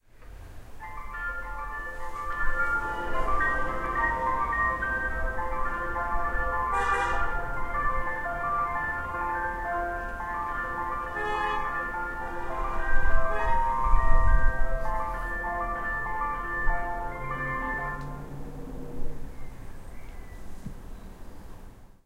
This is a funny recording of an ice-cream van outside my house. He parked in the middle of the road and you can hear other drivers beeping. He finally turns off the music and drives away.
car, cream, engine, horn, ice, music, outside, tune, van